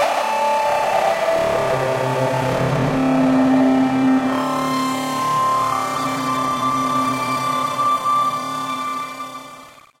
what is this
Time-Stretched Electric Guitar 4
An emulation of an electric guitar, synthesized in u-he's modular synthesizer Zebra, recorded live to disk and edited and time-stretched in BIAS Peak.
time-stretched, Zebra, metal, psychedelic